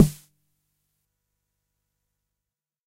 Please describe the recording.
various hits 1 059
Snares from a Jomox Xbase09 recorded with a Millenia STT1
909, drum, jomox, snare, xbase09